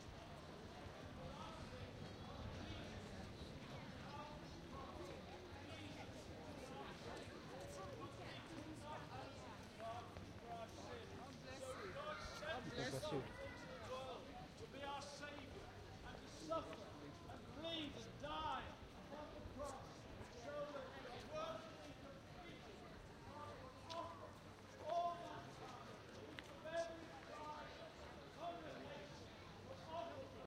street-preacher
Walking through the centre of a medieval town past the town cross, where a street preacher is doing his thing. A lady is handing out leaflets. Binaural recording on a Zoom-H1.
binaural, street-sounds, medieval, street, preacher, cross